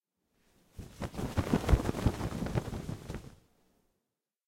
Large bird wing flutter
Sound effect of large flapping bird wings, created using Foley technique with satin cloth. Recorded with Sennheiser MKE 600 mic.
soundeffect, sounddesign, sfx, fx